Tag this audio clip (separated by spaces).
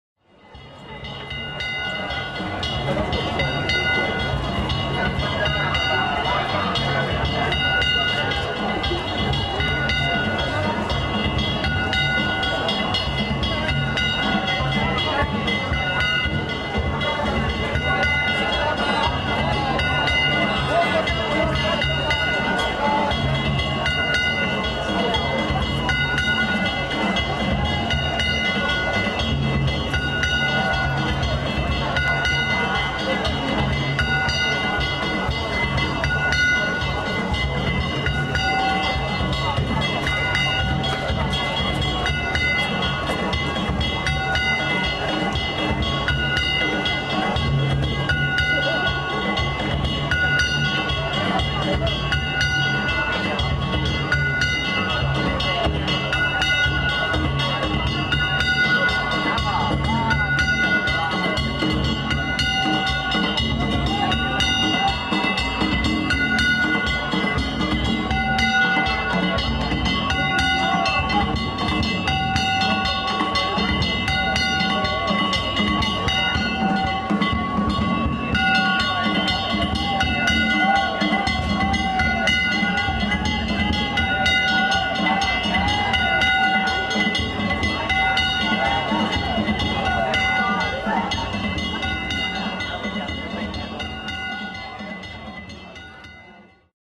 instrument asian recording japan field